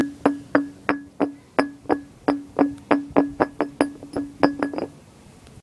Rolling Bottle 06
Sounds made by rolling a small glass bottle across concrete.
bottle, roll